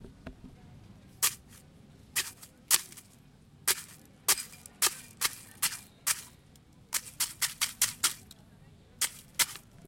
poking ice with a straw MORPHAGENE
ice in a glass hit with a straw several times.
ambient, ice, morphagene